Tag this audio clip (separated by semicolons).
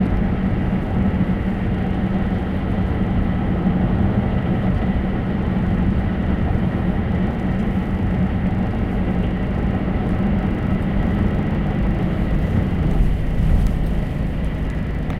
car; drive